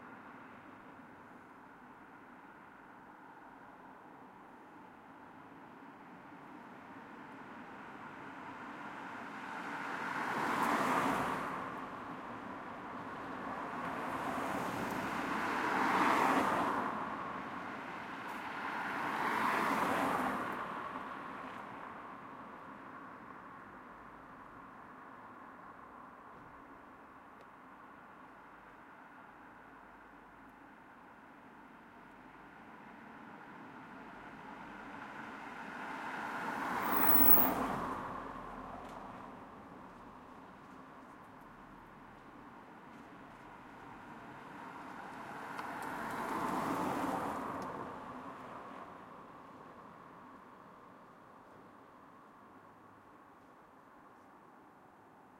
City Road At Night Ambience 2
The ambiance of an inner city street at night.